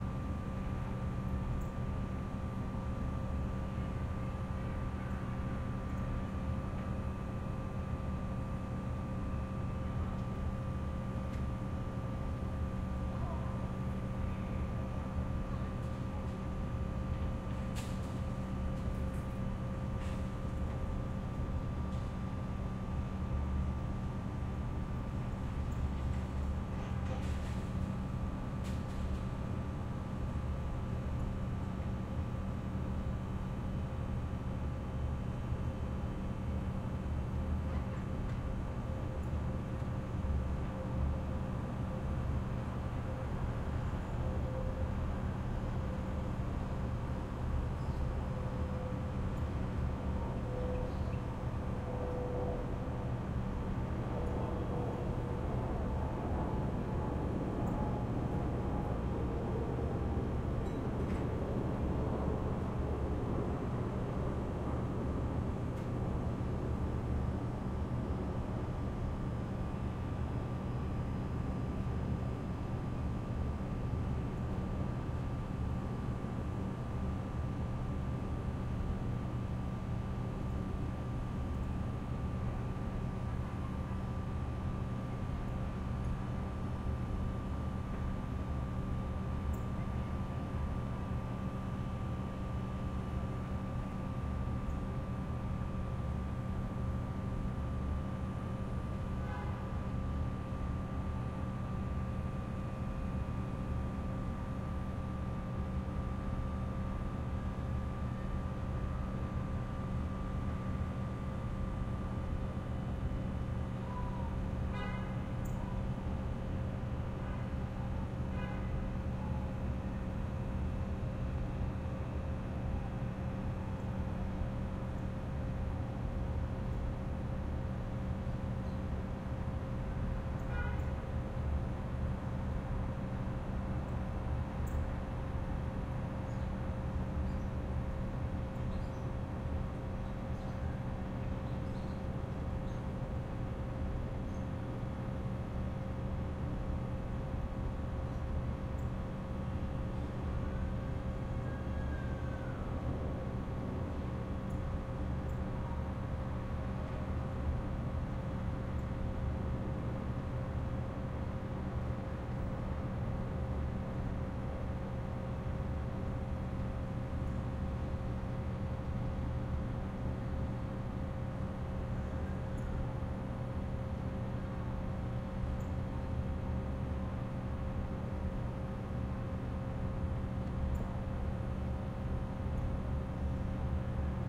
NY 176andSt.Nicholas AMB
New York Ambience from a fifth floor window
176, ambience, field-recording, H6, new-york, Nicholas, NY, St